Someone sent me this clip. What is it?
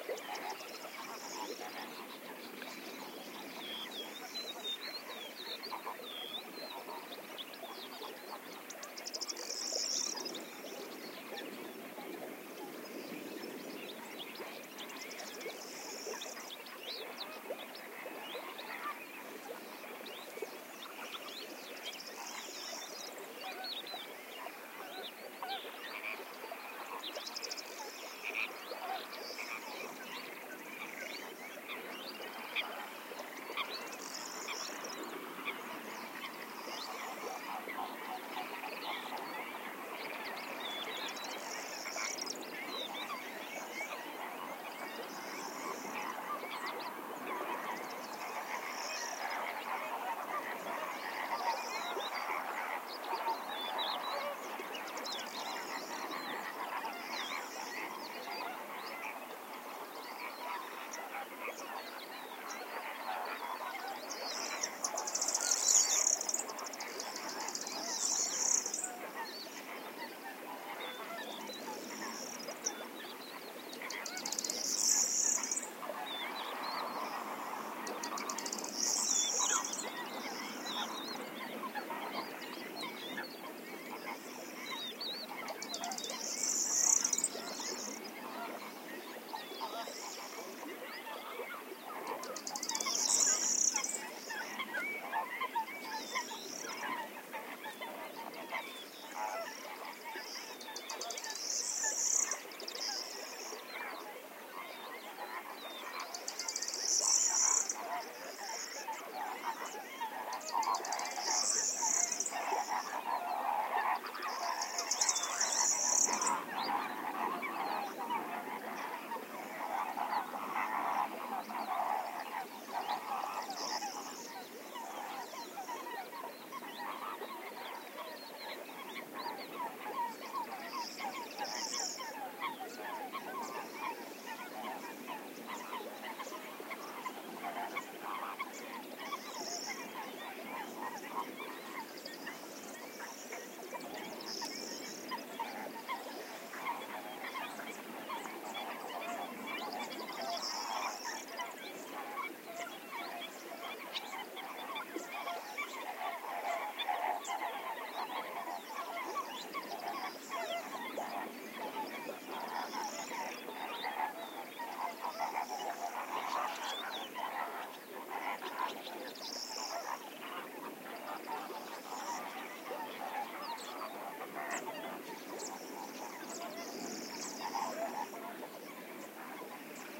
20080302.flamingos.corn.bunting
marsh ambiance with distant flamingos, Corn Bunting, Crested Lark, Coot... along with some wind noise. Sennheiser MKH60 + MKH30, Shure FP24 preamp, Edirol R09 recorder. Decoded to Mid-Side stero with Voxengo free VST plugin